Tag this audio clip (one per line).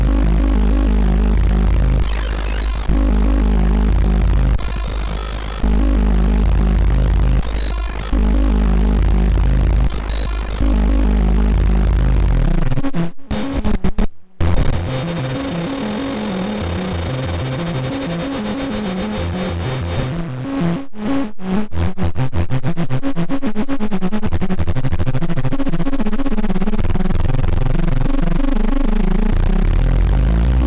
16bit
bastl
kastle
microgranny
mono